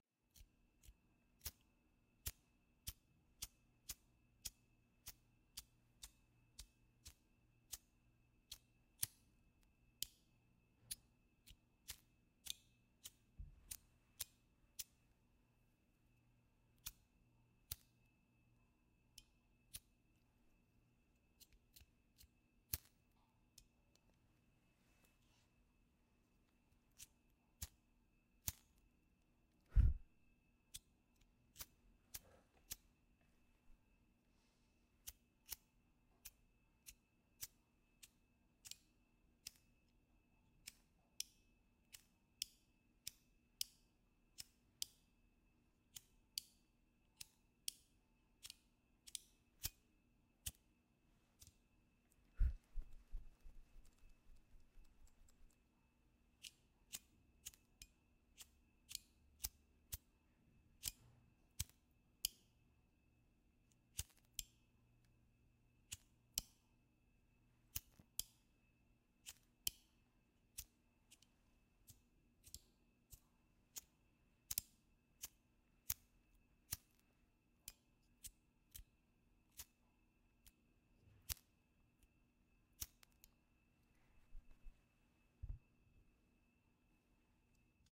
maryam sounds 10
This is the sound of me flicking a lighter, holding the flame up to the mic, and also blowing it out. I do this in differenty sp[ots around the mic to observe the difference ion sopumnds picked up[. we can hear the flick of the lighter, the snap of ignition, the crackle of the sparks as the flame is lit, and the sound of it burning, as well as my breath eventually putting it out. We also hear my thumb release the butane button and it being put out that way as well.